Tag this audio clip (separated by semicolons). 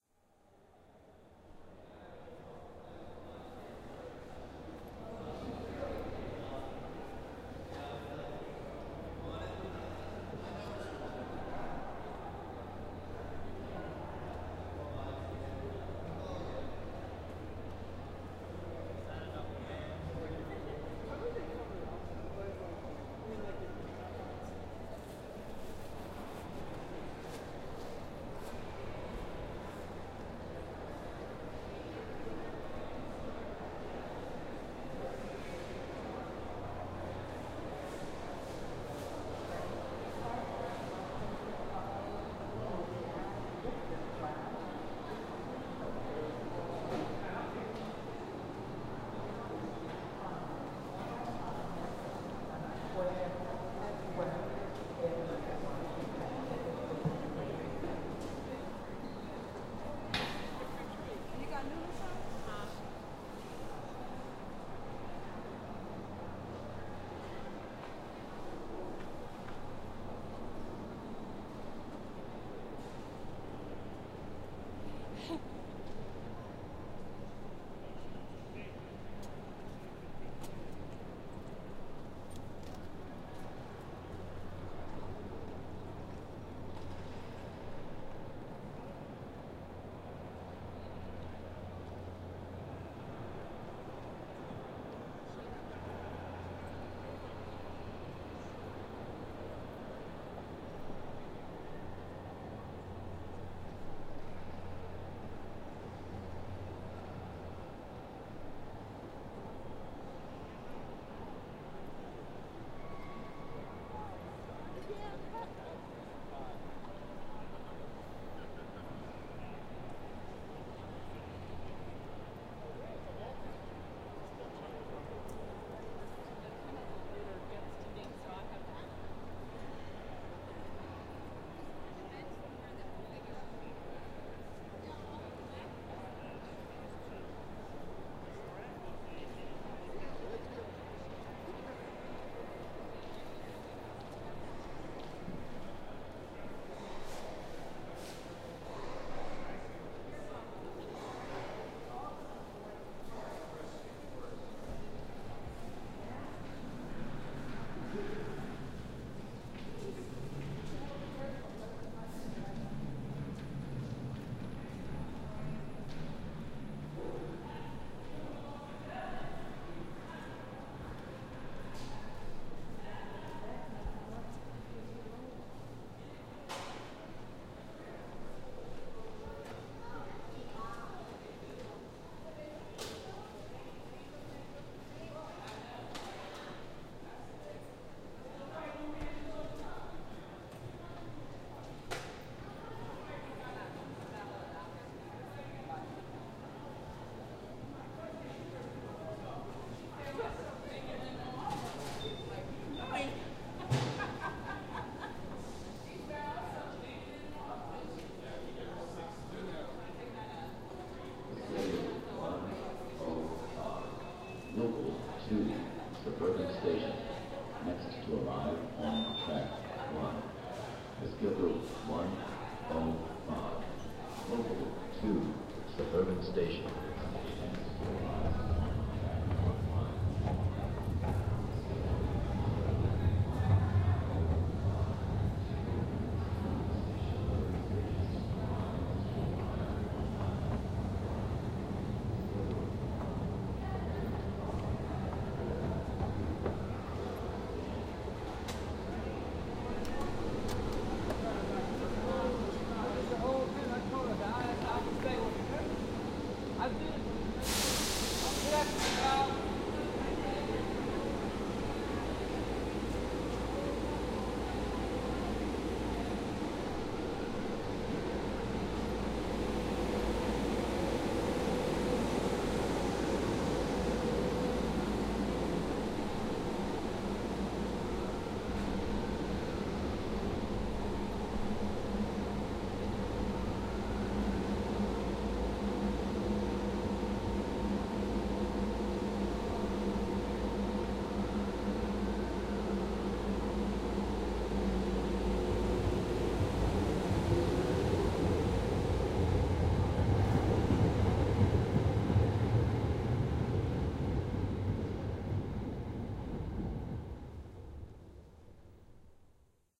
30th-street-station; amtrak; philadelphia; septa; train